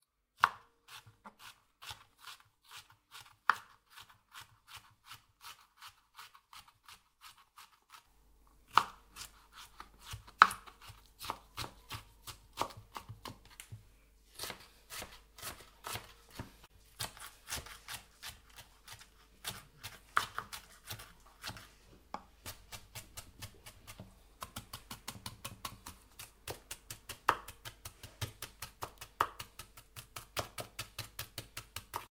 cutting a pile of pre sliced pile of vegetables with varied cutting techniques